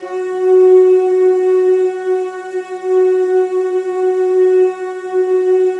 09-flutepad TMc
chorused stereo flute pad multisample in 4ths, aimee on flute, josh recording, tom looping / editing / mushing up with softsynth
3, f, flute, pad, stereo, swirly